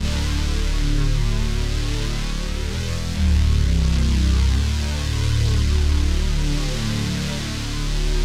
Simple Bass 2
Simple distortion bass